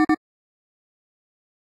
2 beeps. Model 3
beep, futuristic, gui